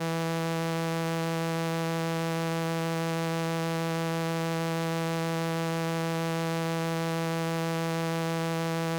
Transistor Organ Violin - E3
Sample of an old combo organ set to its "Violin" setting.
Recorded with a DI-Box and a RME Babyface using Cubase.
Have fun!
70s, sample, strings, electric-organ, electronic-organ, vibrato, analogue, transistor-organ, vintage, raw, combo-organ, string-emulation, analog